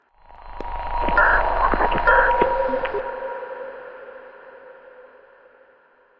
distress signal
I designed this sound to be a distress beacon coming from some long lost spacecraft.